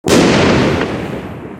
Made with fireworks